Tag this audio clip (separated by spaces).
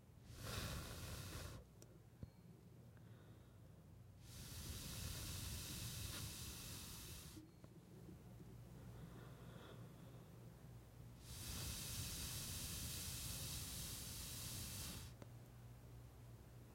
blowing air-noise human-blowing